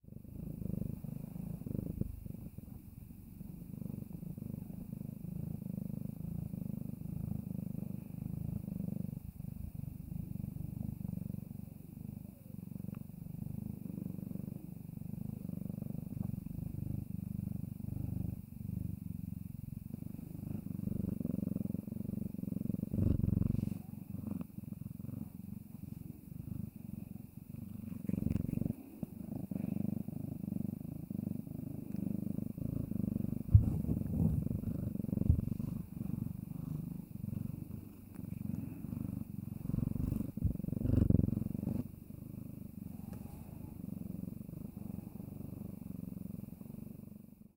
Purring 5 month old tabby cat
My 5 month old ginger tabby kitten purring directly into my CAD E100S large condenser microphone. EQed to have noise reduced.